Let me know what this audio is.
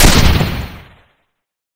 A synthesized laser shot sound to be used in sci-fi games. Useful for all kind of futuristic high tech weapons.